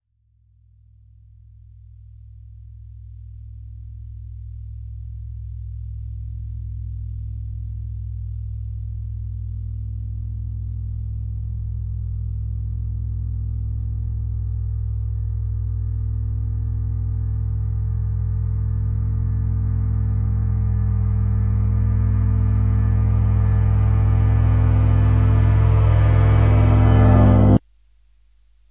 cymbal-reverse
Sound of big 24" Paiste 2002 ride. Hit with felt tip stick.